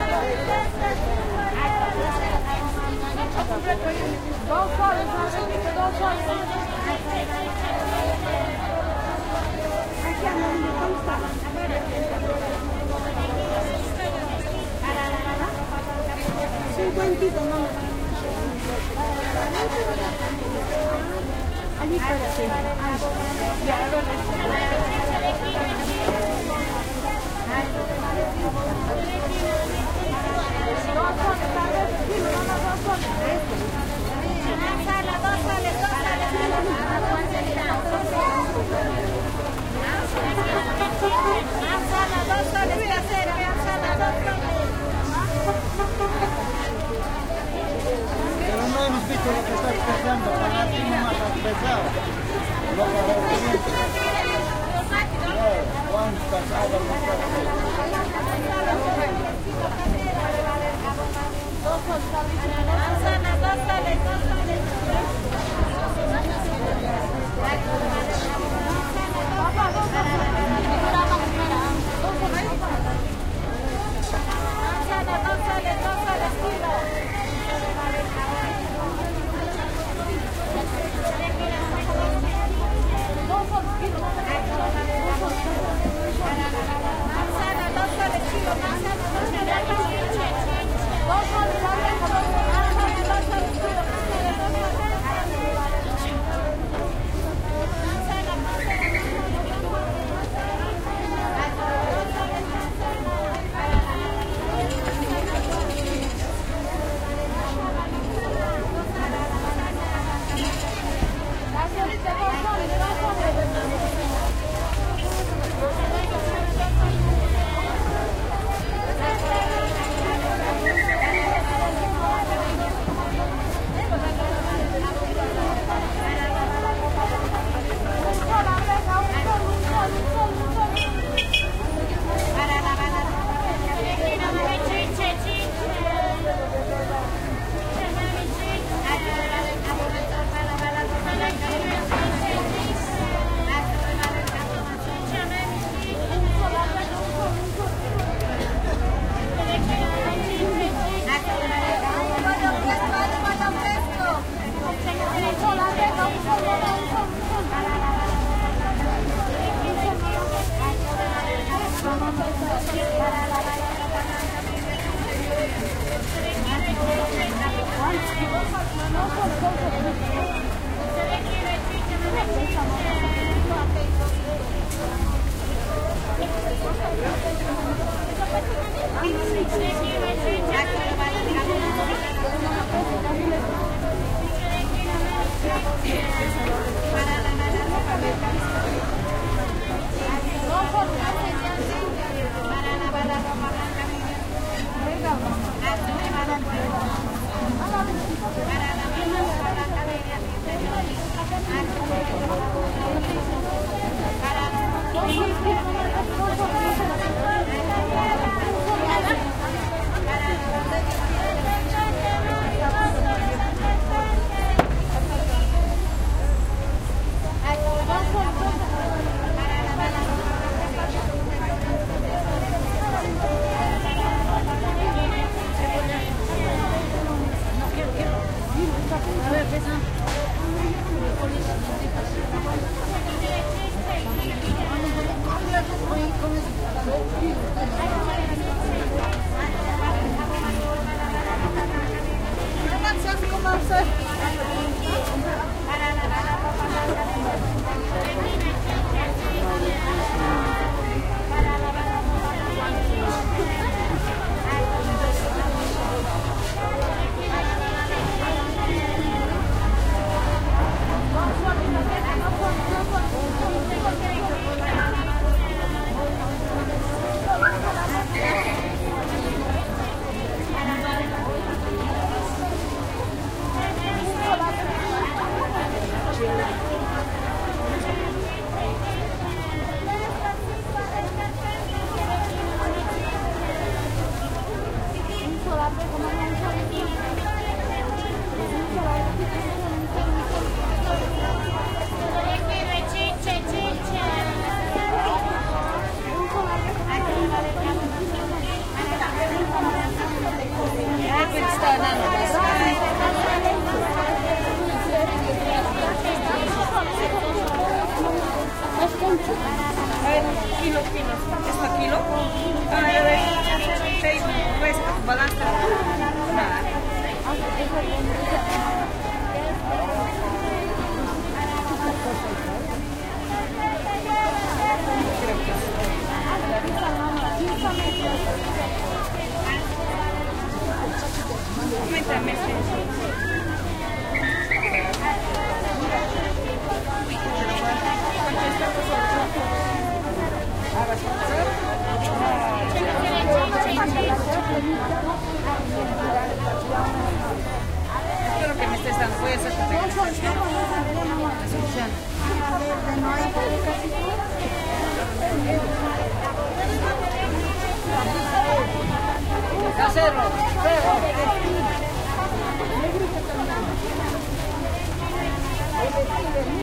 market ext produce and stuff street corner women repeating items selling spanish and quechua with medium nearby traffic Cusco, Peru, South America
spanish, ext, Peru, corner, produce, quechua, street, America, South, market, women